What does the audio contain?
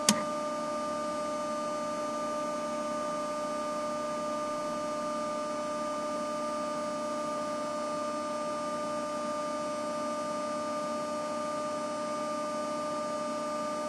0103 DVW500 int cpslock
DVW500 capstan servo locking after unthreading tape.
This sample is part of a set featuring the interior of a Sony DVW500 digital video tape recorder with a tape loaded and performing various playback operations.
Recorded with a pair of Soundman OKMII mics inserted into the unit via the cassette-slot.
cue, digital, dvw500, eject, electric, field-recording, jog, machine, mechanical, player, recorder, shuttle, sony, technology, video, vtr